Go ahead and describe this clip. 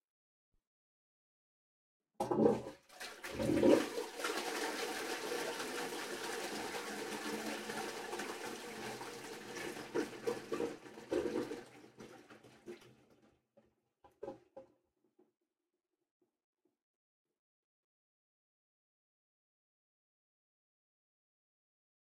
A toilet flushing